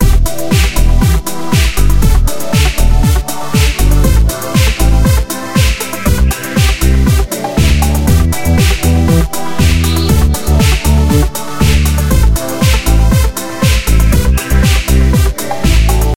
In honor of Red-M!
I have remixed his Trumpet_Delay_loop as if it came straight out of some track, and I will do this to every one of his samples eventually. Sytrus and Synth1 generators used. New BPM of 119.
119bpm, red-m, remix, tribute